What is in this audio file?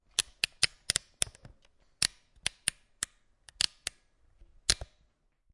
Robot knob
This is the sound of a knob used to adjust robot features at UbiCA Lab (UPF). Their research areas are enclosed within the general disciplines of Ubiquitous Computing, Contextual Intelligence and Cognitive Systems, using sensors and radiofrequency identificaiton (RFID).